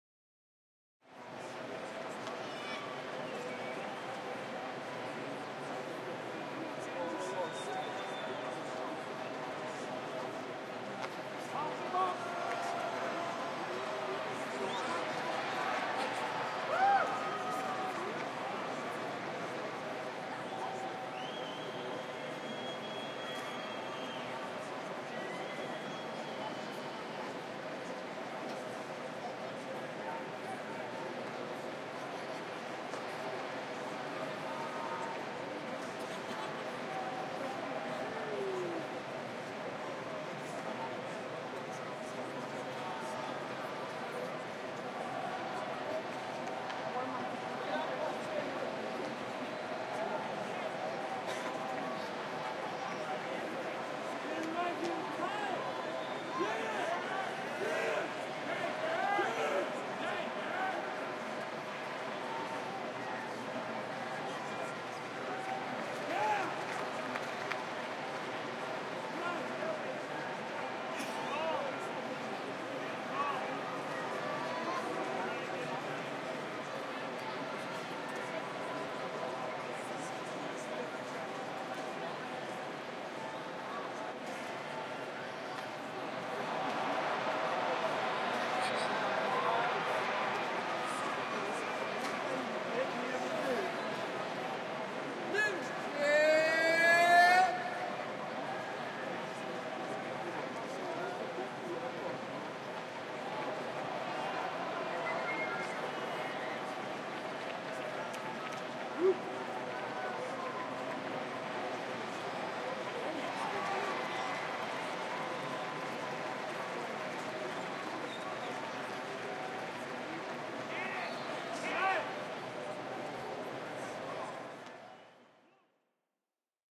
WALLA Ballpark Chatter
This was recorded at the Rangers Ballpark in Arlington on the ZOOM H2. This is just a plain bed of noise at the ballpark, with no cheering or booing, etc.